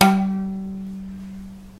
Plucking the duller G string on a violin.
g, multisample, pizzicato, violin